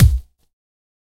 in this series, low ends are made with zynaddsubfx and top end are processed samples of me hitting various objects, used audacity and renoise to stack them
bass, bd, drum, fat, hard, kick, kickdrum, sub